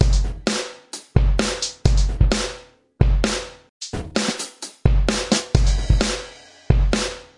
Raw Power 001
Produced for music as main beat.